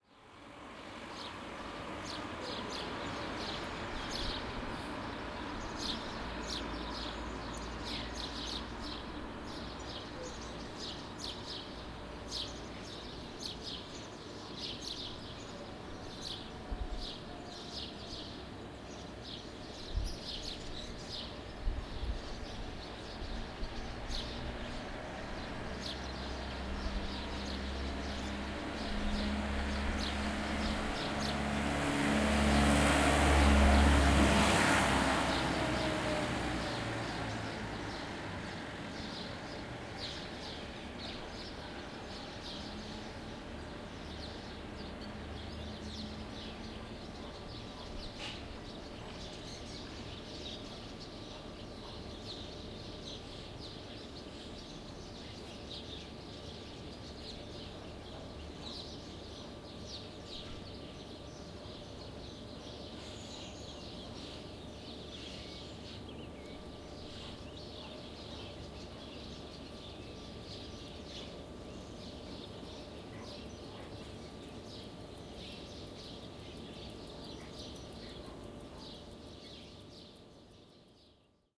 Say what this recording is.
Alanis - Calle Bancos
Date: February 24th, 2013
The sound of one of the main streets in Alanis (Sevilla, Spain) in a sunny winter morning.
Gear: Zoom H4N, windscreen
Fecha: 23 de febrero de 2013
El sonido de una de las calles principales de Alanís (Sevilla, España9 una soleada mañana de invierno.
Equipo: Zoom H4N, antiviento
cars, calle, field-recording, Sevilla, birds, street, traffic, pajaros, pueblo, coches, Alanis, Spain, village, grabacion-de-campo, trafico, Espana